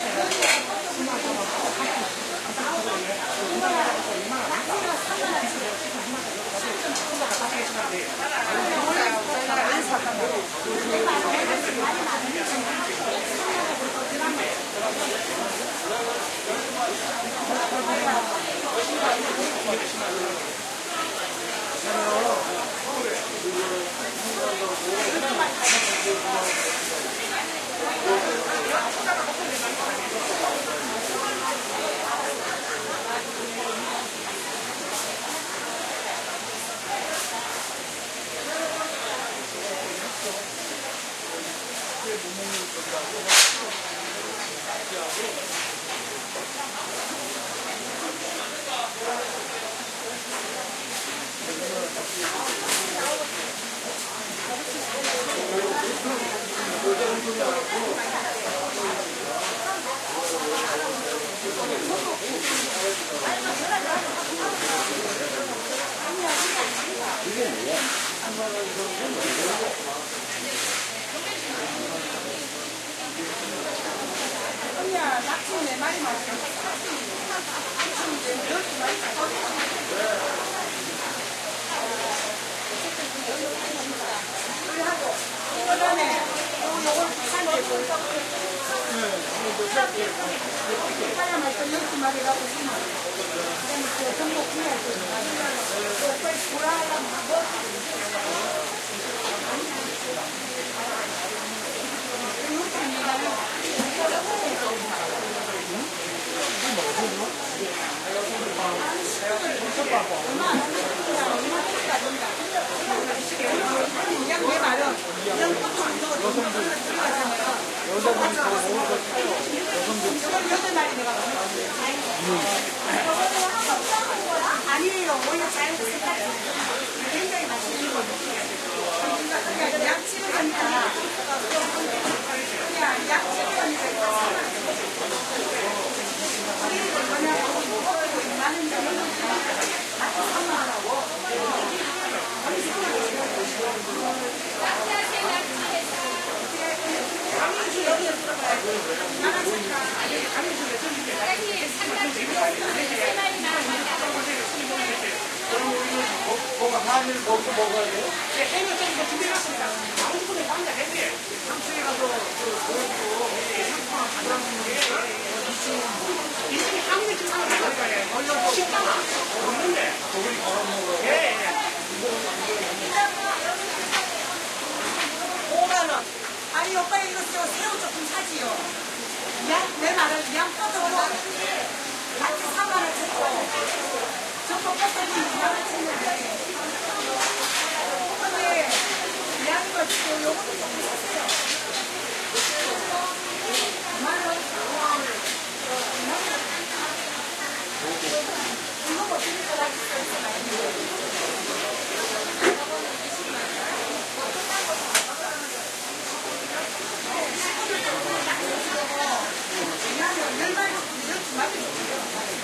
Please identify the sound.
Ambient sound from one indoor section of the famous Jagalchi Fish Market in Busan, Republic of Korea.